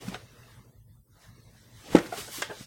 Chair-Dining Chair-Wooden-Person-Sit-02
This is the sound of someone sitting down or getting up from an old squeaky dinning room chair.
Woman, Struggle, Chair, Female, Man, Person, Adjust, Wooden, Sit, Get-Comfortable, Male, Move, Move-Around